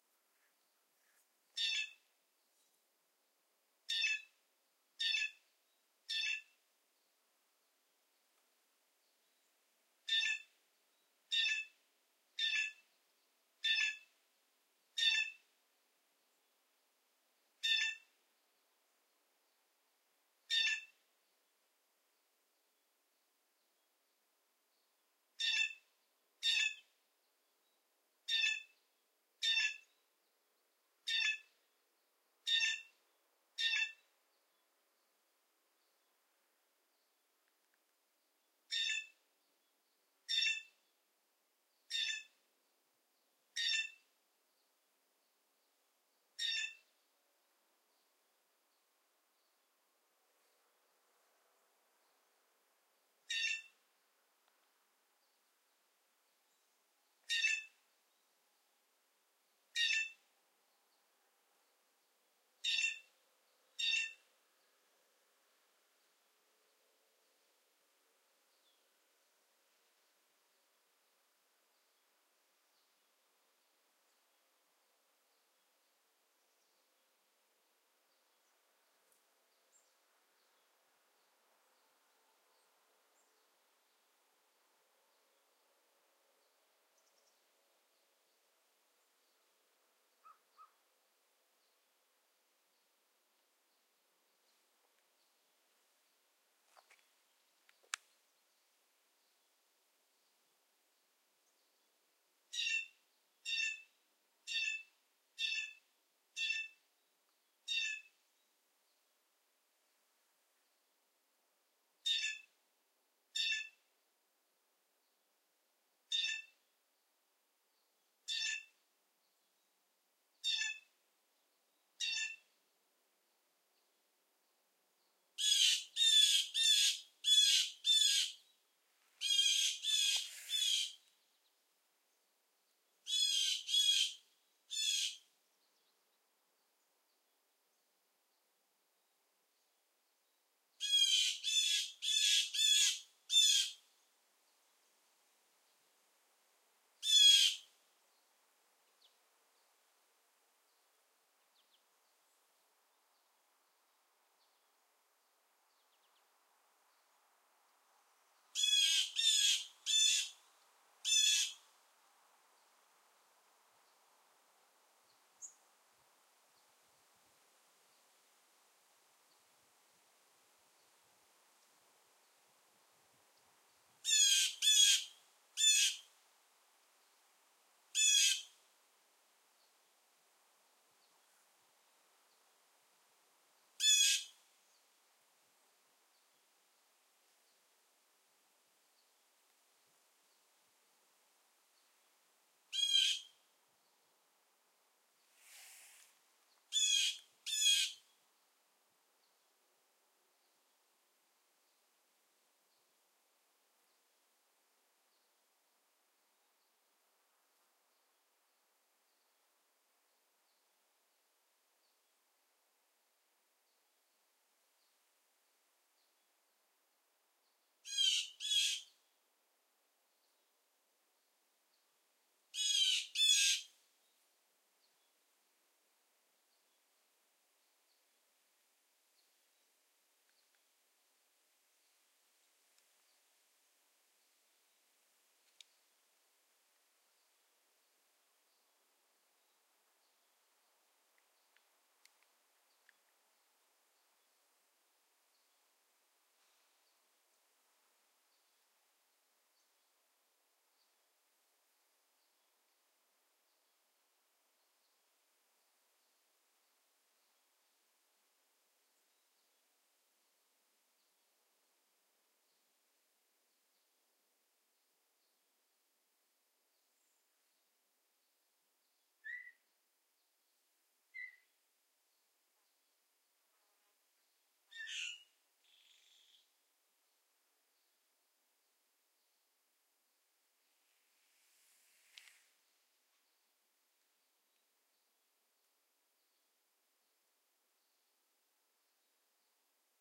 Blue Jay 1 - Grand Pre - Wolfville NS
birdsong, field-recording